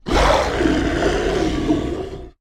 Monster roar 8
A monster roaring.
Source material recorded with either a RØDE Nt-2A or AKG D5S.